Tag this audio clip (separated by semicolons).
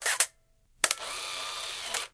photo; photography; camera; shutter